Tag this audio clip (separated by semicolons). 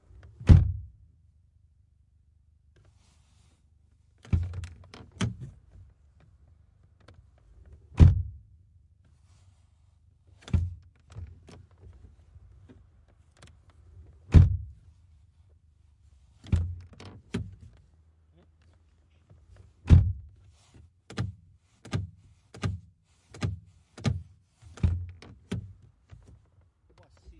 close; door; duster; open; renault